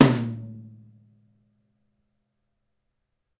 tom - Gretsch Cat Maple 10 - buzz - 1
Gretsch Catalina Maple tom. 10 inch.
10, drums, gretsch, high, maple, tom